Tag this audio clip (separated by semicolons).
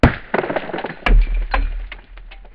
scatter; crashing-rocks; boomy